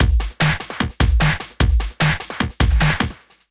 Another of my beats. Made in FL studio, using mostly Breakbeat Paradise.